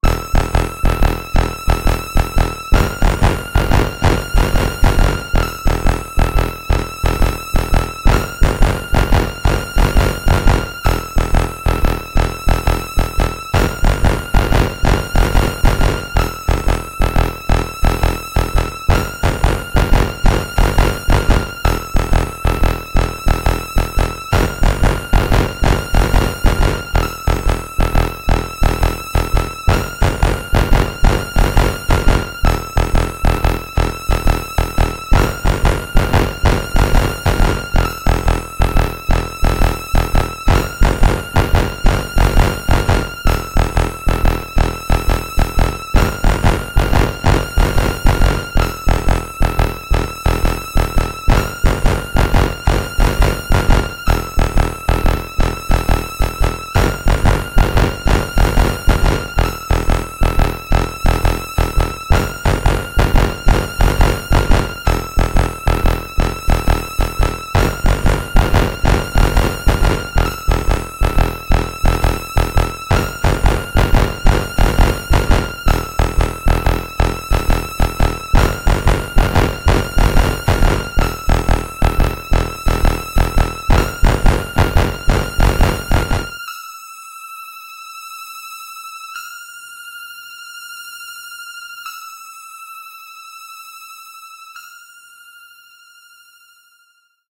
Fight Music Synth Tense Loop
Quick Tense Synth Loop for Fight Scene.
ambient, battle, beat, digital, dnd, dragons, dungeons, dungeonsanddragons, electronic, epic, fantasy, fast, game, loop, music, podcast, rhythmic, rpg, scifi, synth, tense